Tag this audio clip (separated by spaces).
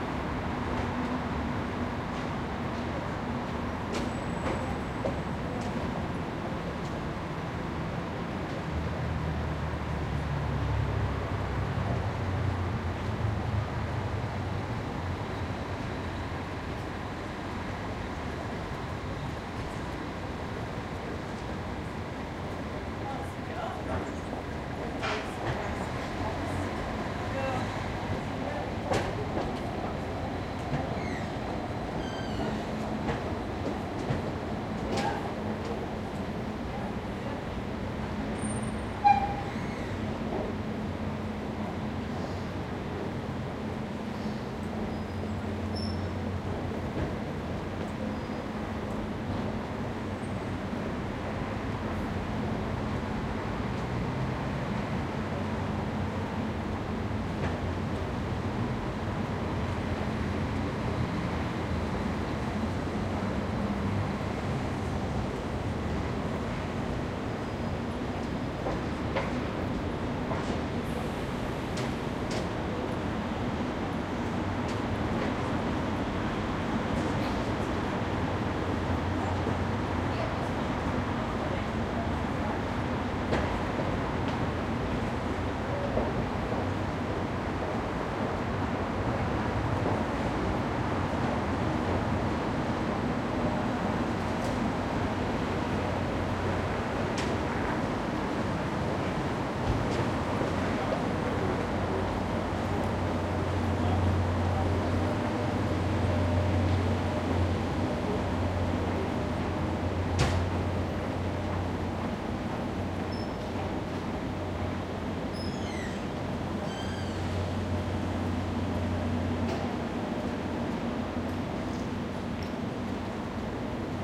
exterior busy footsteps urban ambiance Graz Europe people city open traffic public field-recording